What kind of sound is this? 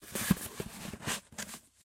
20 Cardboard Folding
cardboard, paper, box, foley, moving, scooting, handling,
box, moving, foley